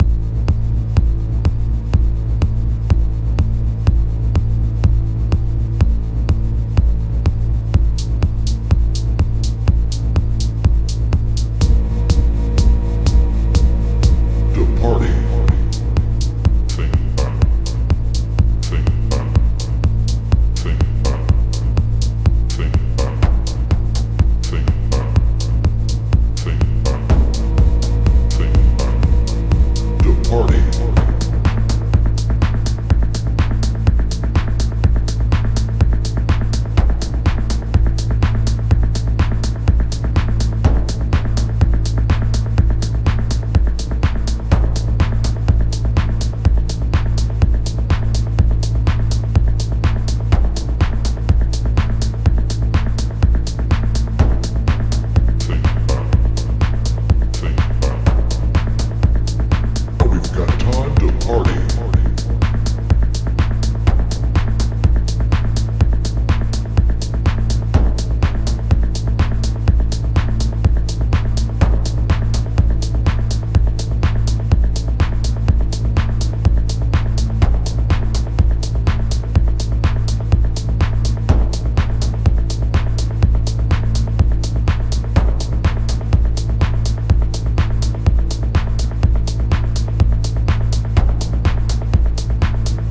Embo-techno. Loop track.